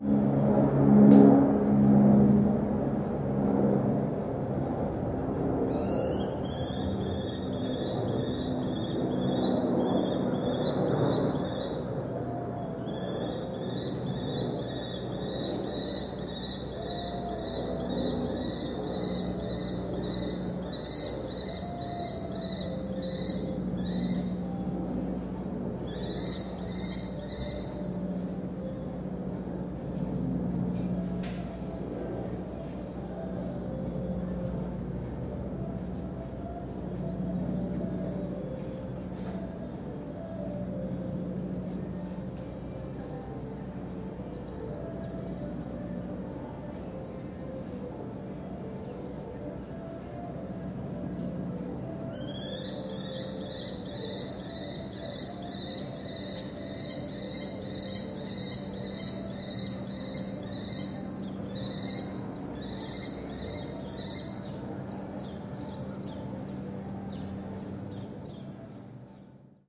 20190323.kestrel.airplane.citynoise
An airplane passing high, then screechings by a Lesser Kestrel (Falco naumanni). EM172 Matched Stereo Pair (Clippy XLR, by FEL Communications Ltd) into Sound Devices Mixpre-3 with autolimiters off.
birds, kestrel